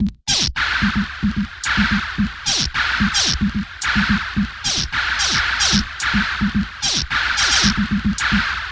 8bit110bpm-39
The 8 Bit Gamer collection is a fun chip tune like collection of comptuer generated sound organized into loops